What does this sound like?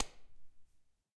Pack of 17 handclaps. In full stereo.